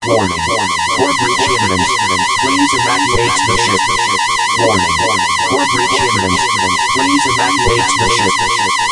core breach imminent
An example of how one can use the Flanger Alarm.
breach, core, loud, alarm, flanger